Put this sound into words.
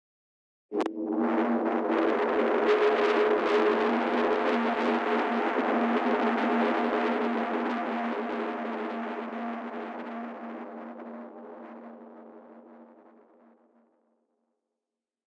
Distorted Elemnts 08
various recordings and soundfiles -> distorted -> ableton corpus -> amp
amp, corpus, distorted